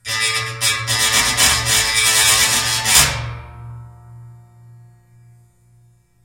hi electric torn - hi electric torn
Electric shaver, metal bar, bass string and metal tank.